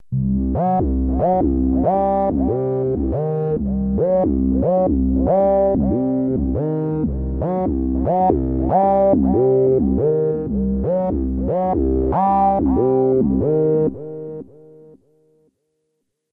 digibass loop 140bpm
ambient, bass, bass-loop, bassloop, techno